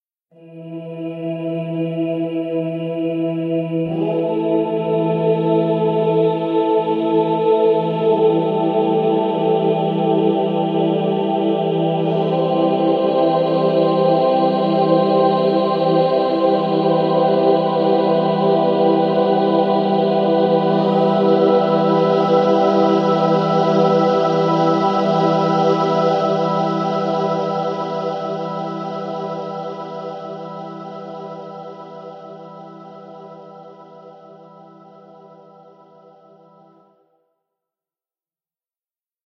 Four-voiced choral chant. Made on a Waldorf Q rack.
atmospheric, chant, choir, choral, ethereal, synthesizer, synthetic, voices, waldorf